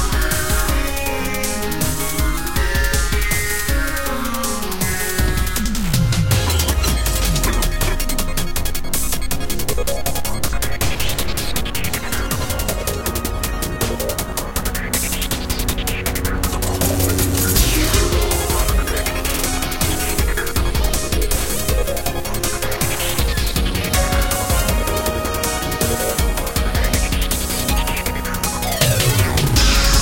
Galaga Song - 16 Weightless
It's time to get some dubstep going! You'll probably hear the original version of this song when playing Galaga Legions Deluxe. This techno musical composition that I created using OpenMPT 1.25.04.00 is what I will use in Galaga Arrangement Resurrection as well. Additional STAR WARS sound effects (including lightaber action, sparks, the sound of Darth Vader breathing, and even General Grievous coughing) are also used in this. The title of the song is "Weightless" and it is also known as "Sixteen!" The title would have been more iconic if you typed in the word WEIGHTLESS in all capital letters and replaced the IG with 16. Phew! It took lots of hard work for me to create this! And watch out – you're gonna collide into General Grievous' loud, asthmatic coughs! The more you hear them, the more annoyed you'll get!
arrangement, composition, coughs, dance, dubstep, effects, electronic, galaga, galaxian, general-grievous, hyperspace, lord-vader, modplug-tracker, music, musical, music-creation, noise, openmpt, sfx, sixteen, sound-effects, sparks, star-wars, stereo, synth, synthesized, techno, video-games, vortex, weightless